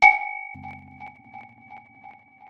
glasstick 001 delay
spacey echo sound, stab style
dub, echo, experimental, glassy, reaktor, sounddesign